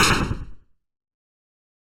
Small boom
A small explosion sound.
Created using SFXR.